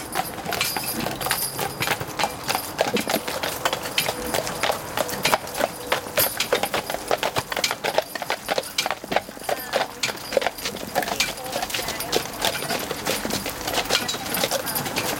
Horse and Carriage
I recorded this sound at a wedding. The brides arrived in a white carriage pulled by two white horses. This was recorded directly by my Canon EOSR. So i figured I would contribute What I have. Thanks
carriage, field-recording, gallop, horse, trot